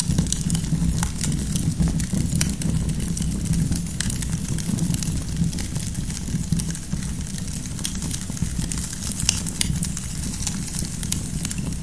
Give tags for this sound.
rpg; background; adventure; white-noise; ambient; fantasy; atmosphere; background-sound; soundscape; ambiance; atmospheric

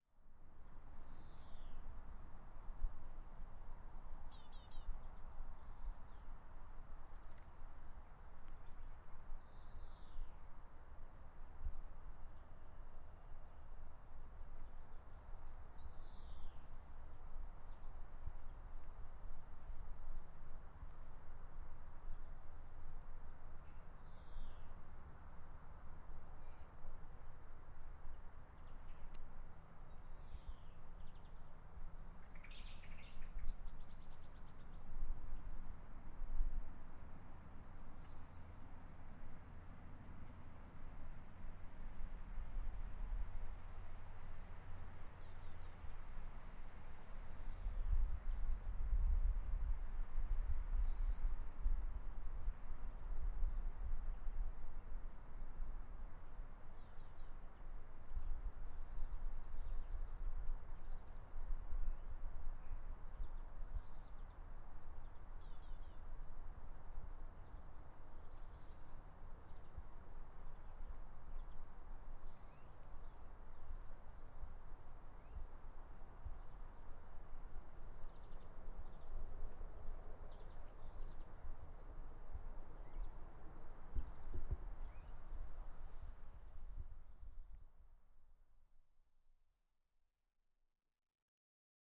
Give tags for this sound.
ambiance; ambience; birds; february; foley; Nature; spring

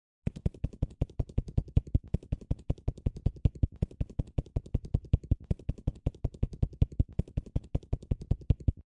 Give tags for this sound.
Helicopter; blades; flying

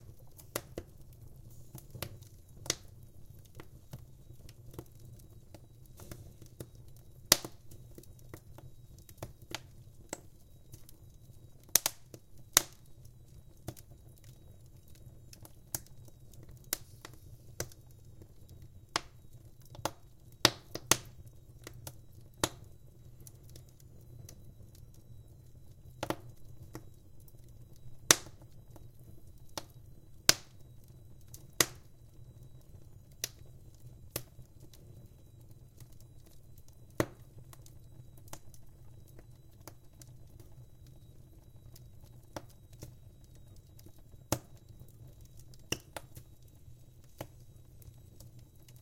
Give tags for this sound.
DR-100; field-recording; sound; burning; burn; Fire; TASCAM

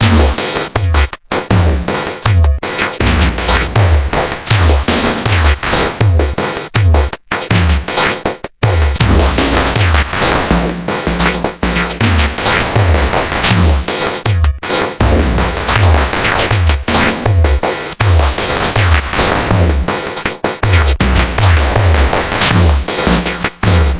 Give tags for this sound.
80bpm; distorted; disturbed; drumloop; spectralised; vocoded

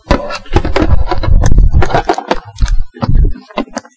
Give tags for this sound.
move; ruffle; shift

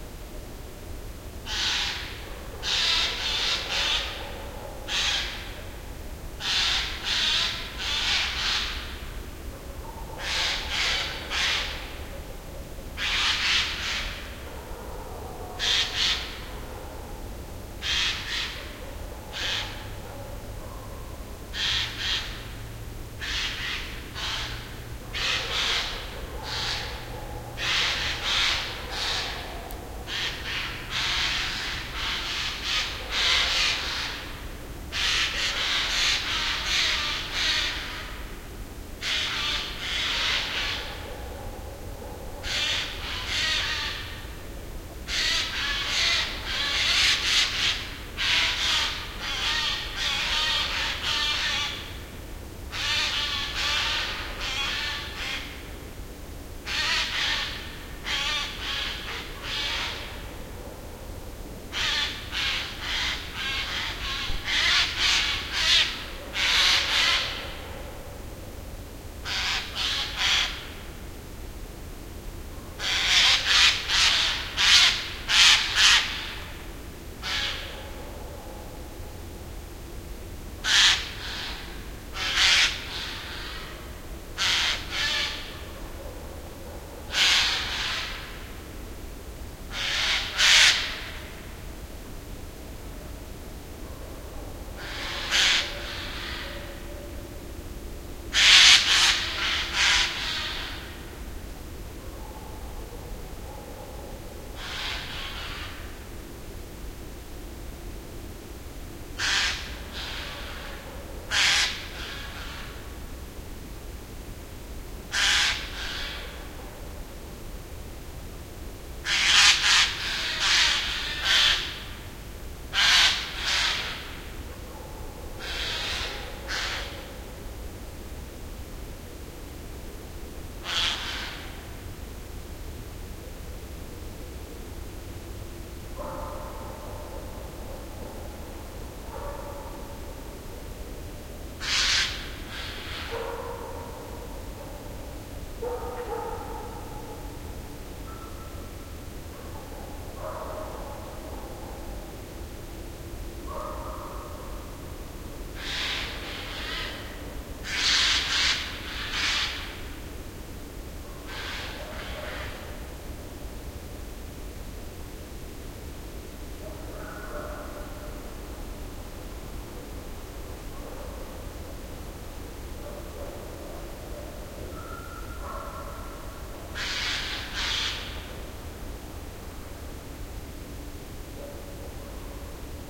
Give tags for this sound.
birds; magpies; nature; forest

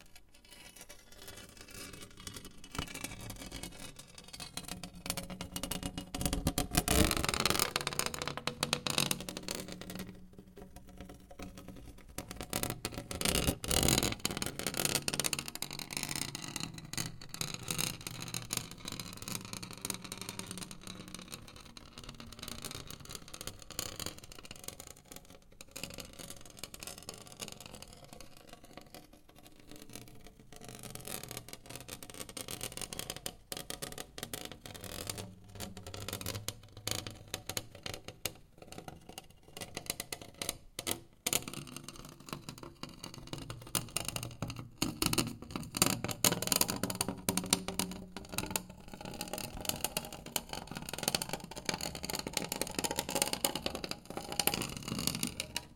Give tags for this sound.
gle; r; piezo; Grattements

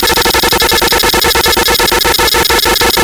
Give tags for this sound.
CMOS; digital; element; modular; Noisemaker; production; synth

